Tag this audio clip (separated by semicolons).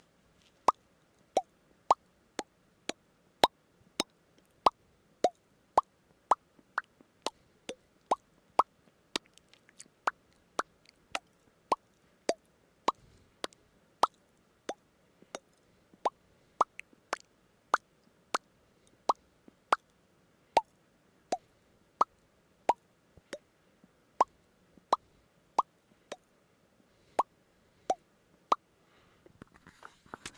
short; button; lips; click; press